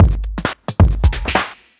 Dark glitchy Dubstep Loop (133bpm)
This is a Burial-like dubstep loop.
2step, Burial, dark, Dubstep, electronic, Glitch, Sample, skippy